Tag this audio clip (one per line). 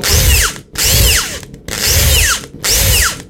machine
hydraulic
pneumatic
machinery
robot
mech